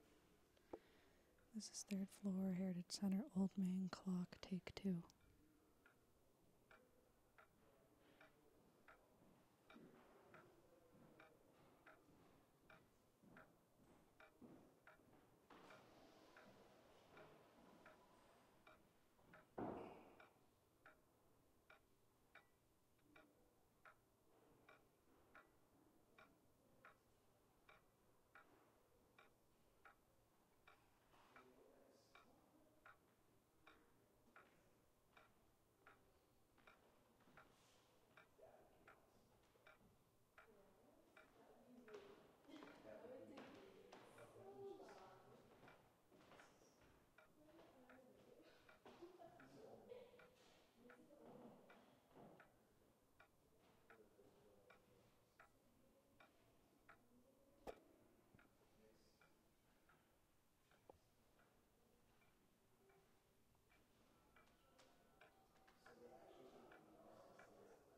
An old clock ticking.